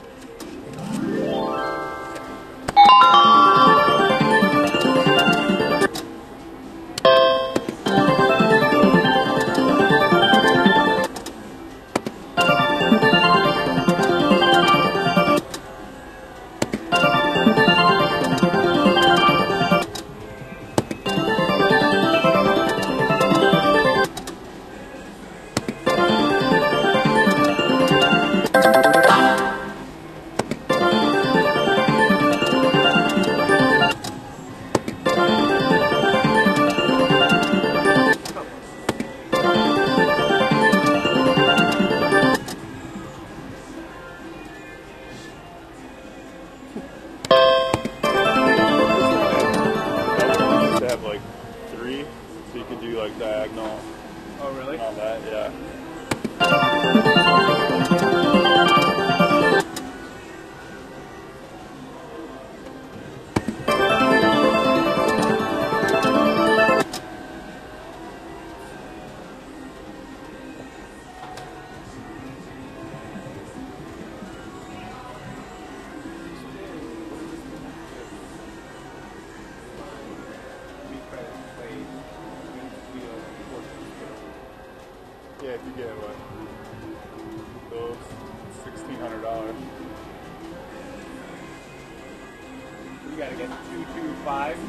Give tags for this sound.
jack-pot
casino
machine
clicking
slot
money
spinning-wheel
field-recording

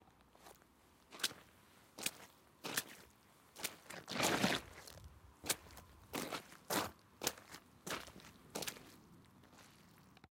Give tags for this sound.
footsteps
gravel
wet

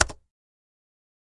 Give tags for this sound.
button
click
clicking
clicky-keyboard
computer
key
keyboard
keystroke
mechanical
mechanical-keyboard
mouse
press
short
switching
tap
thack
type
typewriter
typing

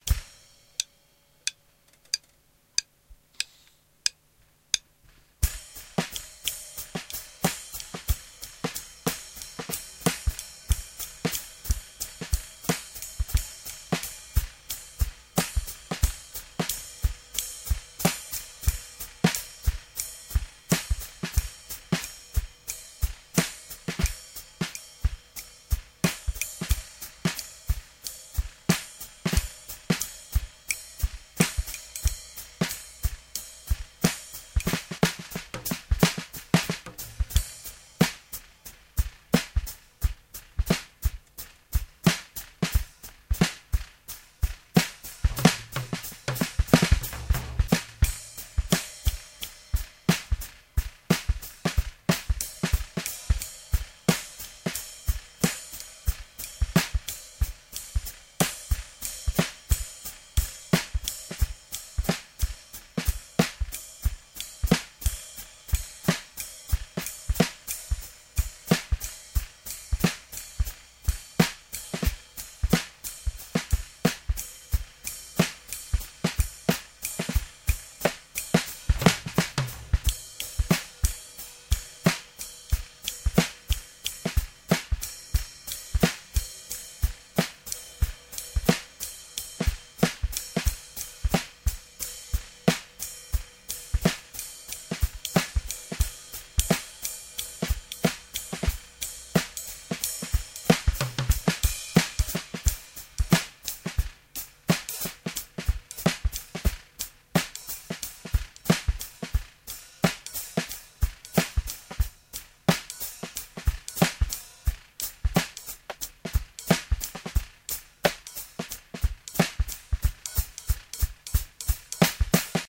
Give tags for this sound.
ace
bournemouth
download
drum
free
funk
jazz
london
manikin
producer
robot
samples
shark
space
time

